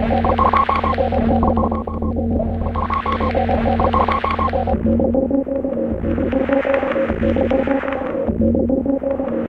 A stereo loop with an interesting texture.